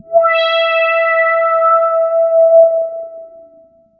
Multisamples created with subsynth. Eerie horror film sound in middle and higher registers.